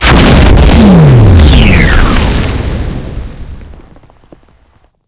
large alien structure exploding.